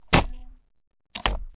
open close
A tape recorder tape tray being opened and closed.
click, close, closing, open, opening, recorder, tape